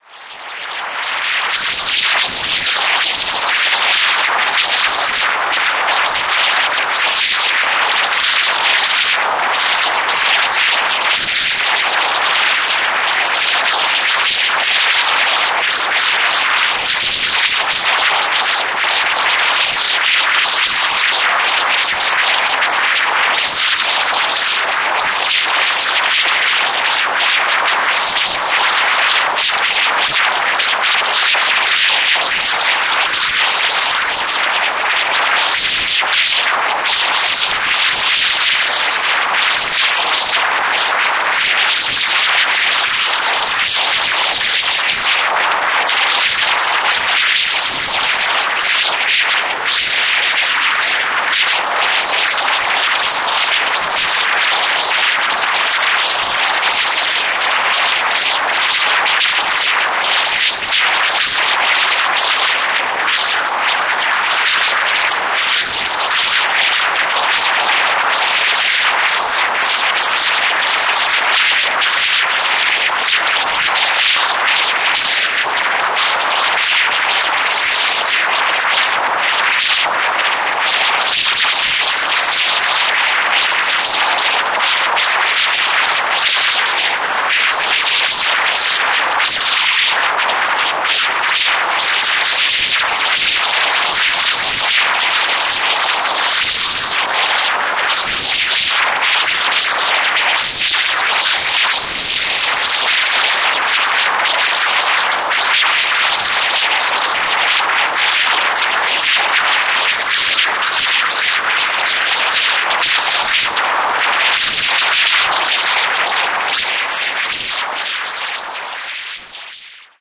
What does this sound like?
This sample is part of the “Wind” sample pack. Created using Reaktor from Native Instruments. Almost only higher frequencies. Very short and quick wind bursts. Could be a swarm of robotic insects.
ambient, drone, reaktor, soundscape, wind